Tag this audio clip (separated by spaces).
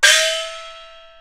peking-opera chinese qmul gong beijing-opera idiophone percussion xiaoluo-instrument compmusic china chinese-traditional icassp2014-dataset